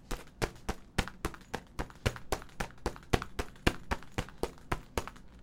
Steps on grass.
ground, foley, steps